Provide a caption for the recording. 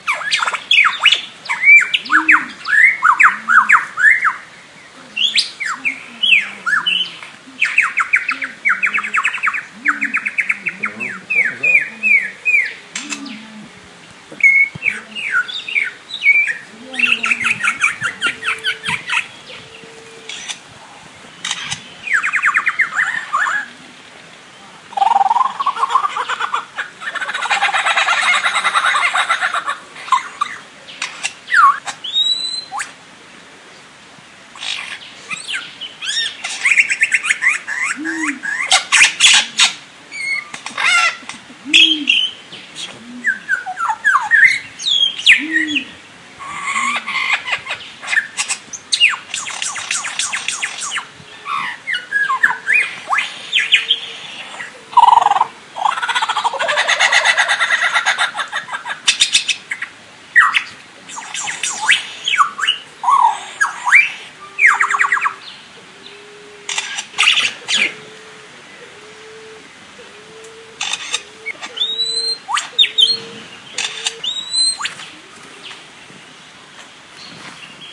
Lyrebird imitating sounds at Healesville Sanctuary
This is a slightly edited and normalised iPhone recording of a Lyrebird's performance at Healesville Sanctuary in Victoria, Australia and shows the range of sounds they can imitate. Among other copied bird calls you can hear a camera shutter and other harder to pick sounds that sound a little bit like lasers. It's pretty amazing what they can do!
Bird-Call, Camera, Healesville-Sanctuary, Imitating, iPhone-recording, Laser, Lyrebird, Mimic, Normalised, Shutter